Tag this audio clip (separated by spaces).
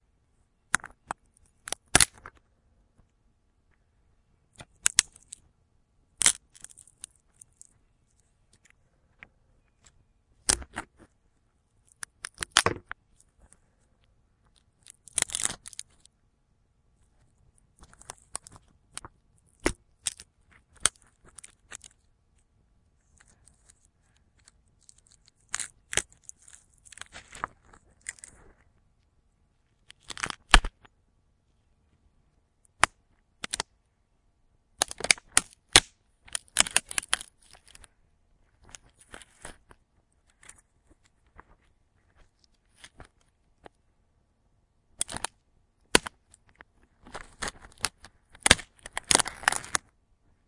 crack stick